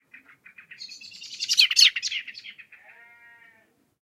Startled Blackbird
A dual mono field recording of a startled or angry Common Blackbird (Turdus merula) flying over the mic.The microphone was set up by a garden pond in an attempt to record frogs croaking, the placement of the mic was exactly where this bird takes it's bath at the shallow end. I think it took exception to the furry Deadcat covering the mic. Rode NTG-2 & Deadcat > FEL battery pre-amp > Zoom H2 line in.
mono, startled, doppler, bird, field-recording, turdus-merula